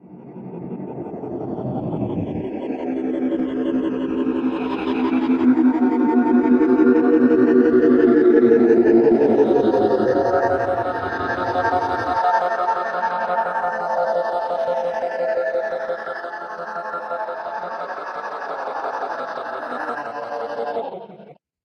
Hell's Oscilator
Haunting army of lost souls flying around your head
oscilator, dark, drone